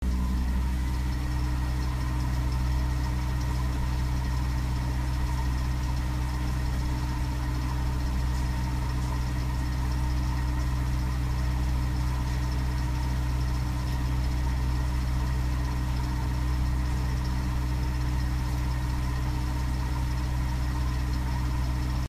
Literally a generator sound
Generator, industrial, machine